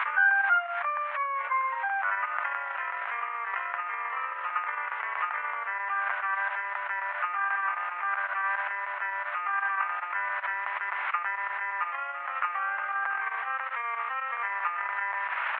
Blues for the masses 06 B
Jazz or blues piano samples.
classical, jazz, piano